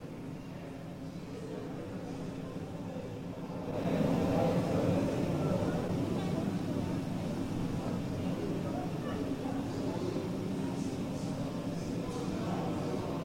hallway chatter
recording of a college hallway right before class starts.